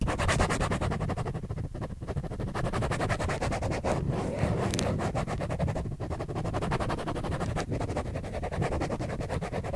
scribbling on paper